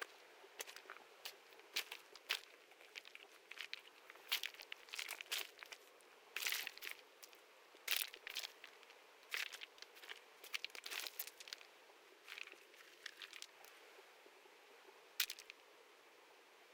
Rock walking river quiet with Limiter and Hard EQ
Recorded at Eagle Creek trail on Tascam HDP2 using a Sterling Audio ST31 microphone.
field-recording, Nature, oregon, stream, waterfall